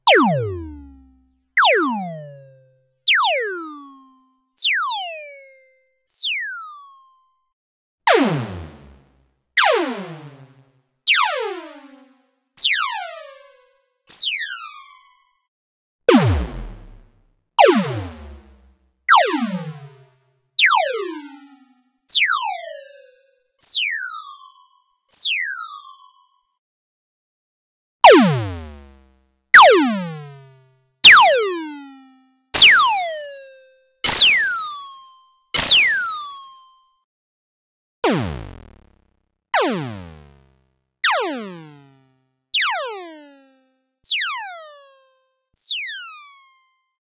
Laser compilation 01
Created using the Korg Electribe 2 (the synth variant) analogue modeling synthesis engine and FX.
If you don't like the busywork of cutting, sorting, naming etc., you can check out this paid "game-ready" asset on the Unity Asset Store:
It's always nice to hear back from you.
What projects did you use these sounds for?